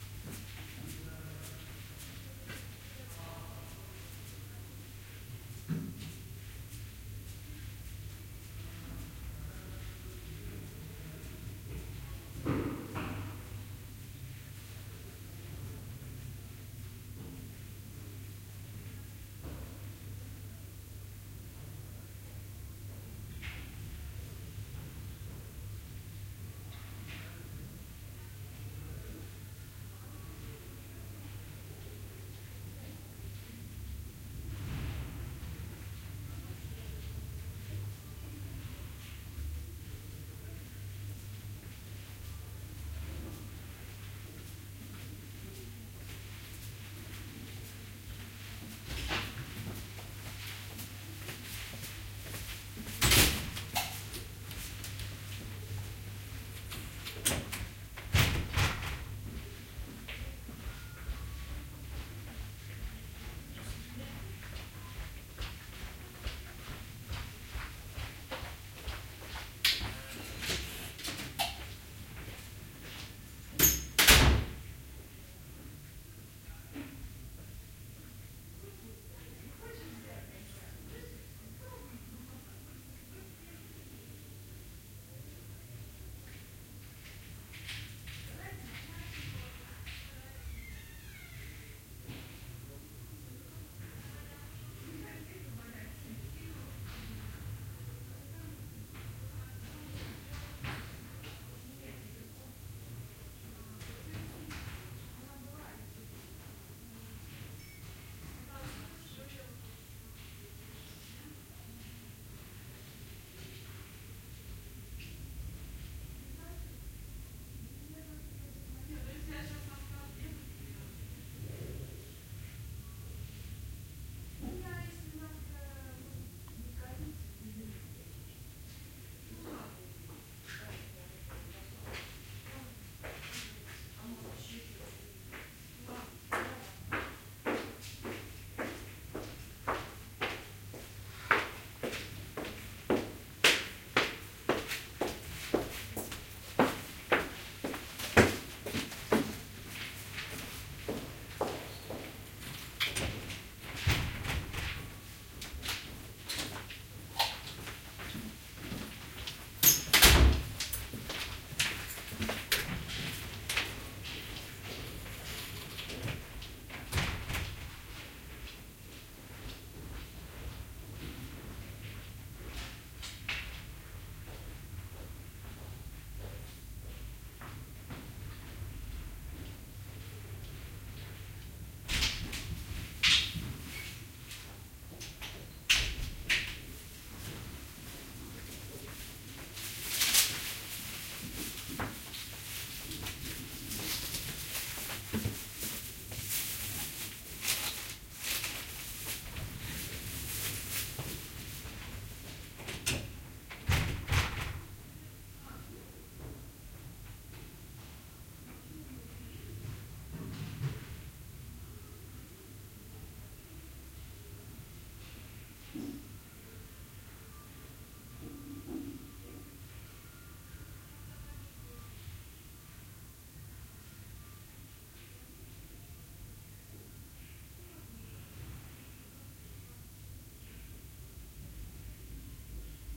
quiet hall with plastic doors and russian voices
Quiet hall with some voices, footsteps and plastic doors
stairs, steps, russia, doors, footsteps, hall, staircase